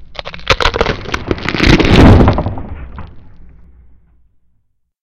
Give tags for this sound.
break down

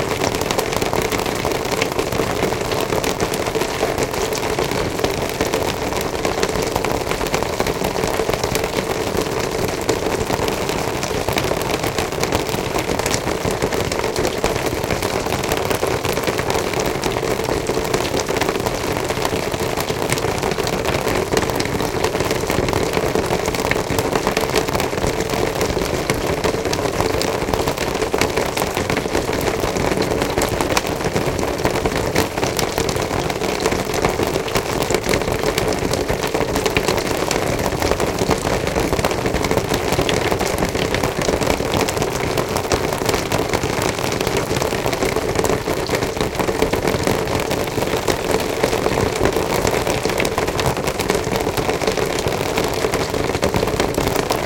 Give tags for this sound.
drizzle rainy water drops wet raindrops rainfall raining rain weather dripping drizzl

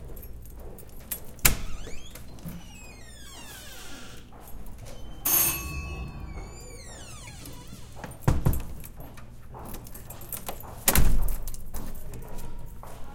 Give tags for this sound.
doorbell hall home house-recording indoor

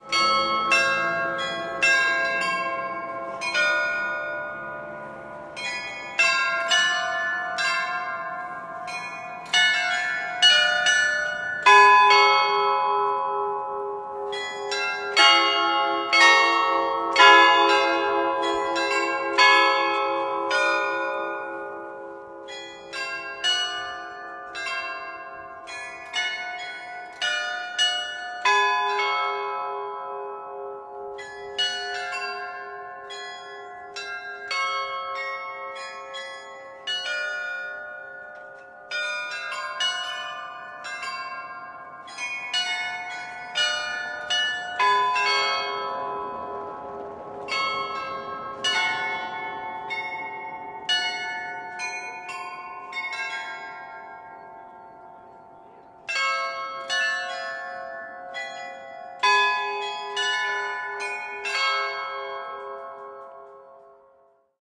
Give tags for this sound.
bell church CZ Czech Loreta Panska toy

